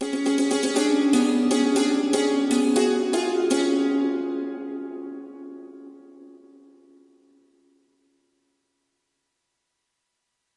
Short phrase I made with a cimbalom patch on a Korg Triton.